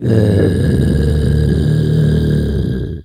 Imitation of dog growling using mouth
growling, mouth